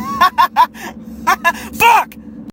haha f**k